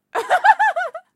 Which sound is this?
Female laugh 05
My friend's laughter.